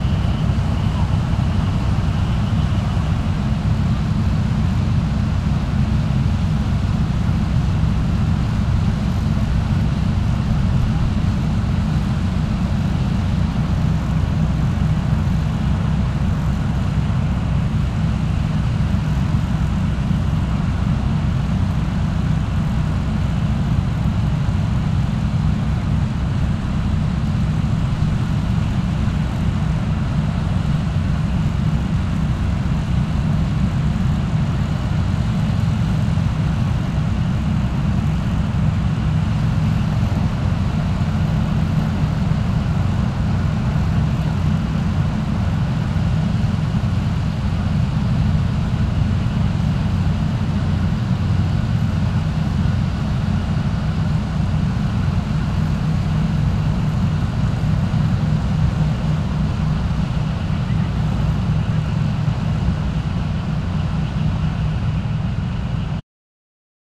WAR-TANK-ENGINE STAND BY-Heavy diesel engine-0001
Heavy trucks, tanks and other warfare recorded in Tampere, Finland in 2011.
Thanks to Into Hiltunen for recording devices.
engine, warfare, diesel, parade, tank